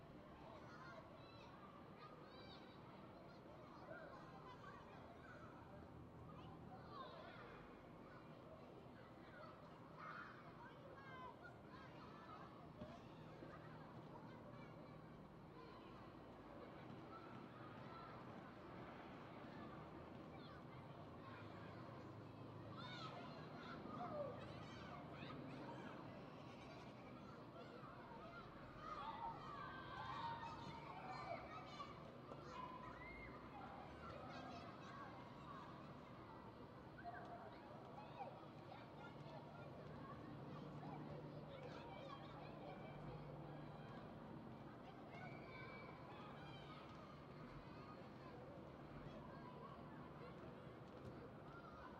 Kids Playing
School playground opposite to my flat, recorded with a MKH 416 and a Fostex. Playground half full, windows closed.